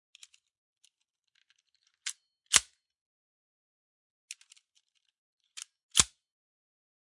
GUNRif M1 GARAND RELOAD 2 MP
Field recording of an M1 Garand reload. This sound was recorded at On Target in Kalamazoo, MI.
caliber, clip, firearm, gun, m1, rifle